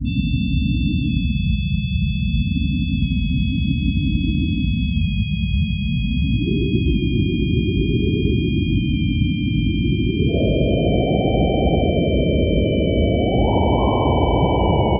More coagula sounds from images edited in mspaint.